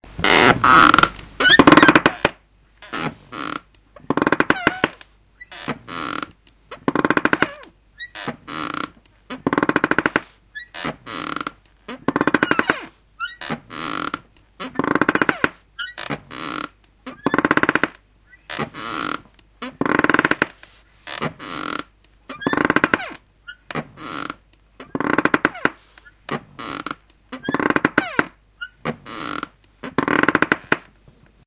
creaking rockingchair 1
The sound of a creaky rocking chair
chair, creaky, creaky-rocking-chair, furniture, rocking-chair, squeaky